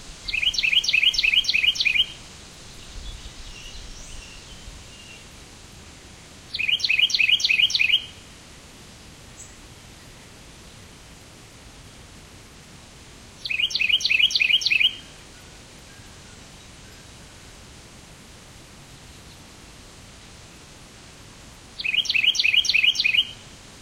Carolina Wren chirping
chirp, chirping, bird